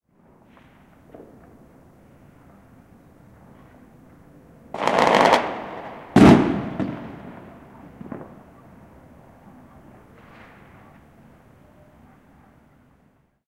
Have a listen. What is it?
FR.CTC.05.fireworks.2
field-recording, loud, mzr50, fireworks, sound, noise, ecm907, explosion